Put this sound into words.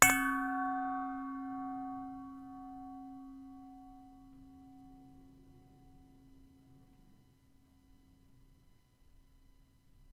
This is a bell / chime sound
I hit a bowl to create this sound
Recorded on a Yetti Blue Microphone 2015
chime, Ping, Ring, Ting, ambient, Gong, Ding, Bell